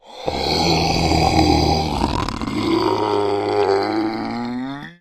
5 seconds of a deep, hissing and gasping, monster groan is this file.
zombie, gross, groan